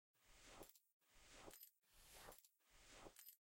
The sounds made by a person walking minus the actual footsteps.
Keys, Material